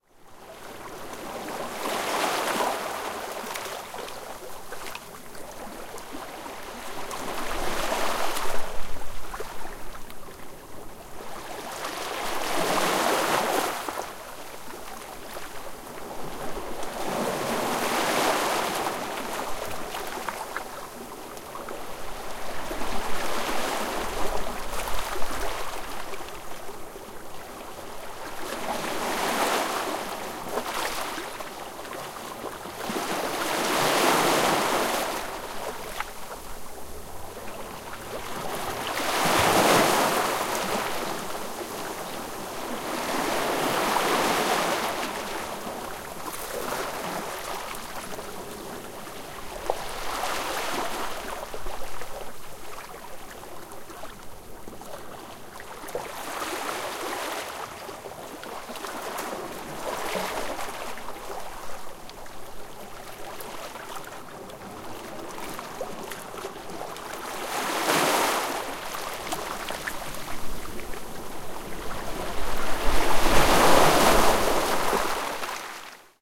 Gentle waves coming in and out with some pebbles rolling. DR-100MKII uni directional. Rycote Mini Windjammer
coast, DR-100MKII, sea, South-West-England, waves